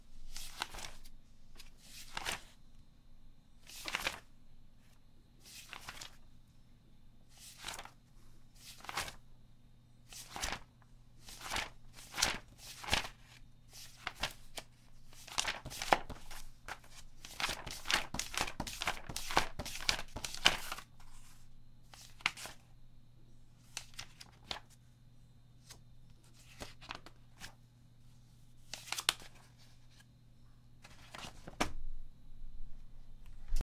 page turns
Turning pages in a magazine at various speeds. Recorded with a Neumann LM 103.
turn, page, magazine, book, turning, pages